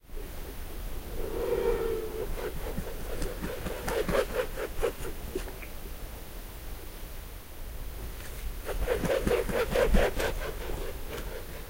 An odd sound: I set pocket recorder out hoping to capture some birdsong; a raven swooped down and made a couple of passes above it. Perhaps he was attracted by the colorful fuzzy windscreen? At any rate: recorded on an Olympus LS-14, some wind in the trees in the background.